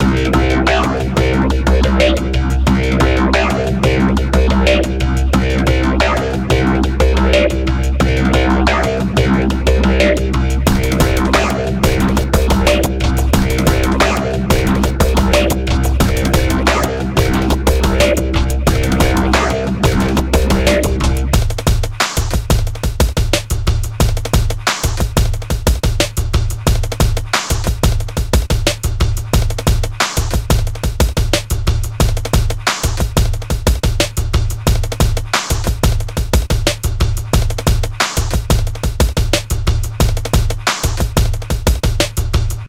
dl 90bpm
loop 90 pbm
drum, 90, loop, bpm